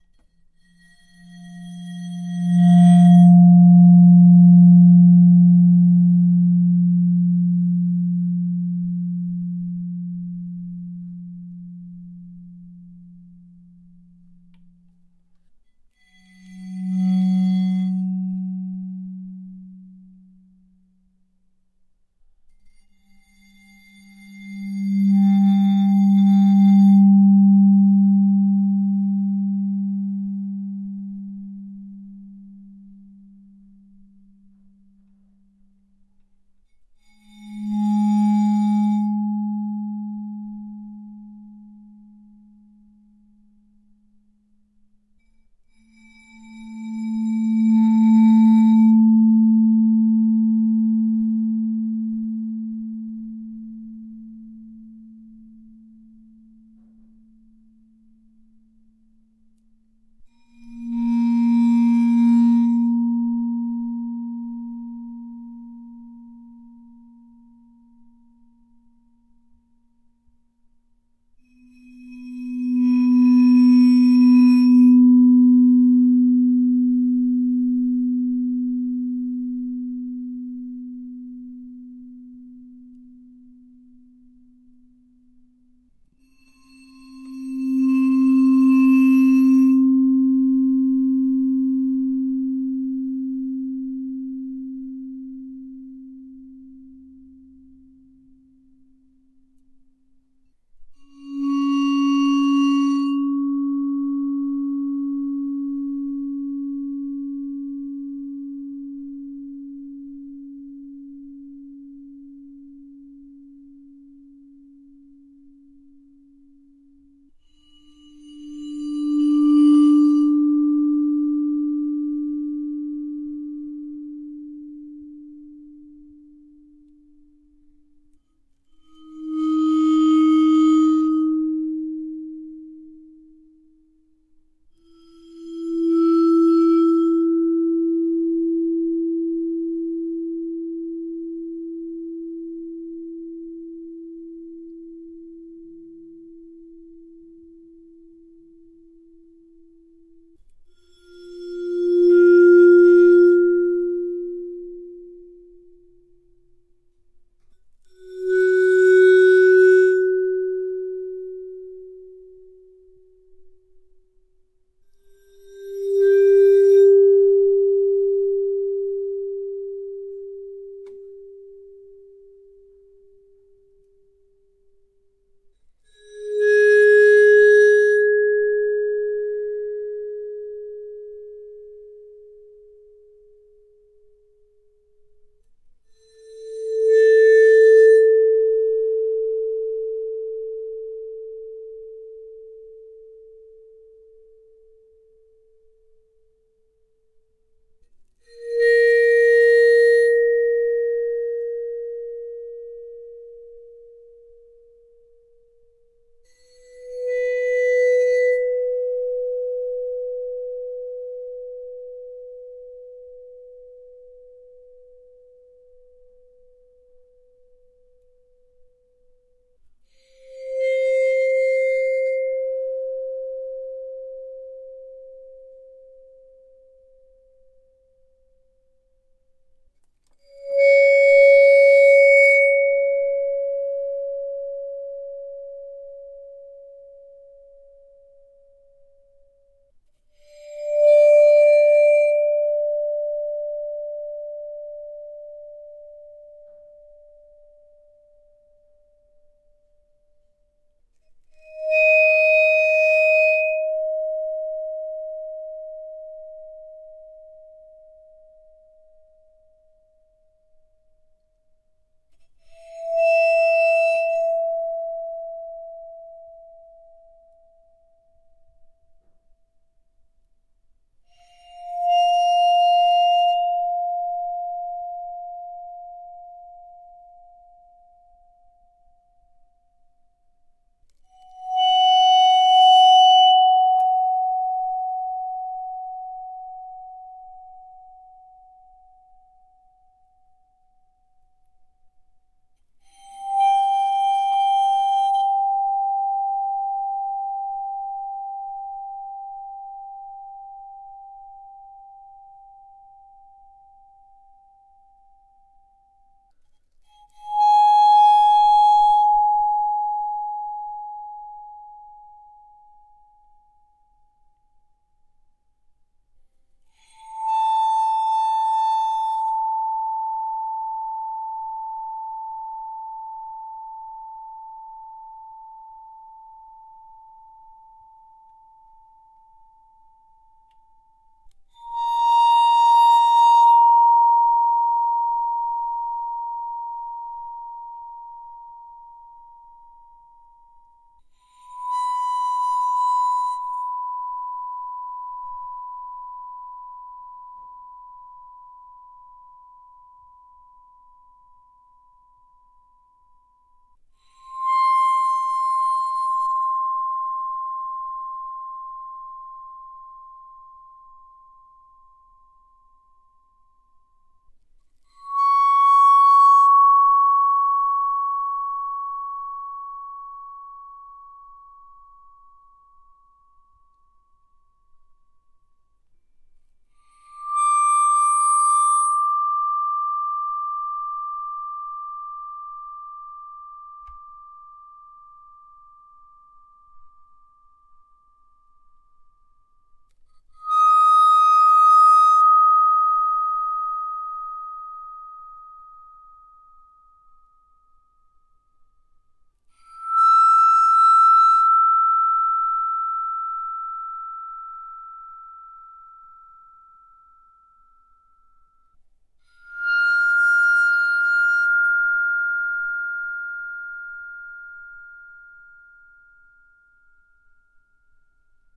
Vibraphone Bow F-F
Up close recording of me bowing a vibraphone up the chromatic scale from F to F, accordingly. I used a Zoom H4n along each of the bars. If you want higher pitches, check out 'Crotales Bow C-C' in the pack.
Bar, Bell, Bow, Bowing, Chromatic, Creepy, High, Horror, Instrument, Musical, Notes, Percussion, Pitch, Scale, Scary, Screech, SFX, Suspense, Vibe, Vibraphone